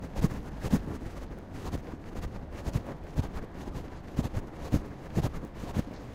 Rubbing against clothing
Jeans pocket with an object in it... a recorder. Recorded with a Zoom H2.